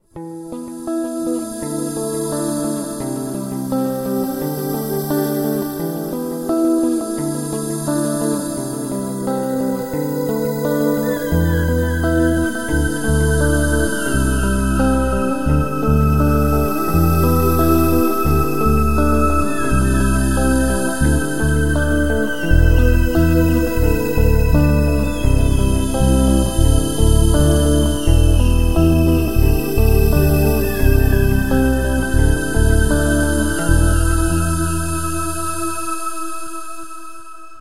A short drone-ambient intro by Dead Tubes Foundation
Created with guitar (Phil Pro) and bass (Cort Action V)
Guitar recorded by Shure PG58 microphone and DIY mic preamp
Amp used: Fender Champ 5F1 clone (DIY stuff)
Software reverb and delay in postproduction
Software used: Audacity (free), FL Studio
Synths made in FL Studio Sytrus
sci-fi, electro, intro, dark, processed, synth, music, cinematic, space, atmosphere, drone, dreamy, ambient, soundscape, ambience